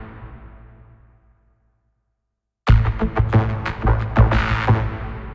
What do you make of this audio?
Effect Drum
cool, effect, drum